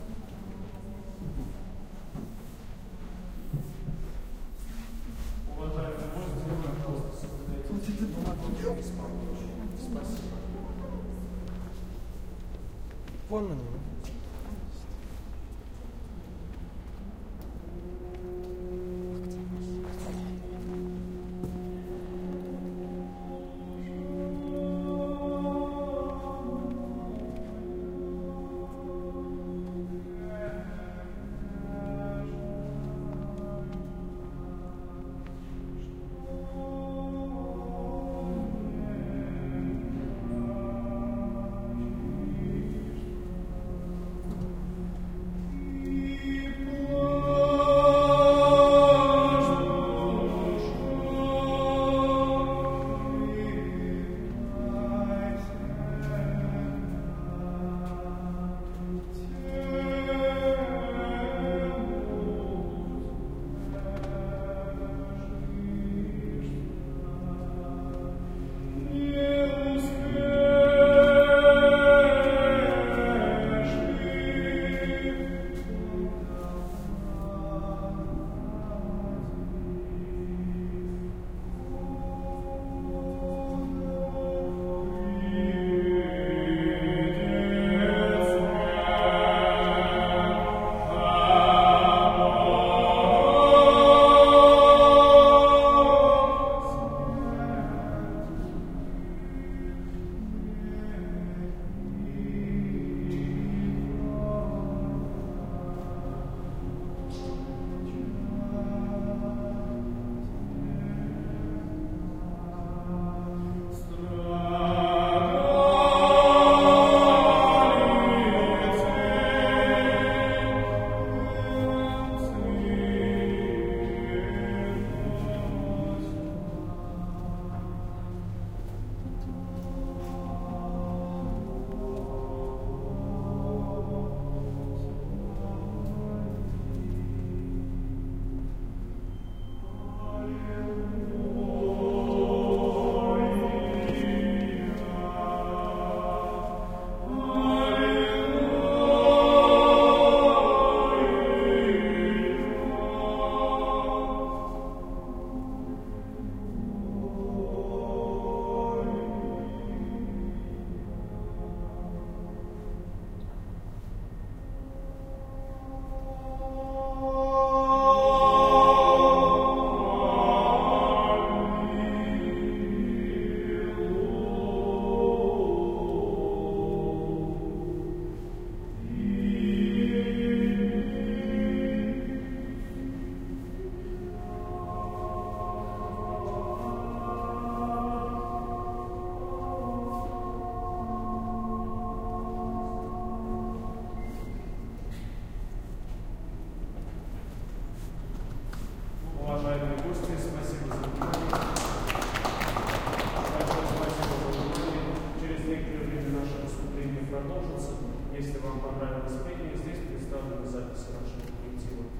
Crowd & Male trio singing at Cathedral of Vasily the Blessed, Red Square, Moscow 18.01.2016 OMNI
A male trio singing at Cathedral of Vasily the Blessed, Red Square, Moscow.
Recorded with Roland R-26's OMNI mics.
male-trio, singing, ambience, christian, crowd, choir, Russian, church, choral